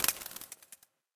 Metal Cracking
Glitchy cracking metallic sounds, can be used as hi-hats or many other things ;).
cracking, cracks, electric, electrical, glitch, glitched, glitches, glitching, hi-hat, hi-hats, idm, iron, metal, metallic, noise, rhythm, ripped, ripping, rips, spark, sparking, sparks